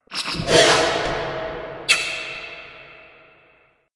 A Sci-Fi sound effect. Perfect for app games and film design. Sony PCM-M10 recorder, Sonar X1 software.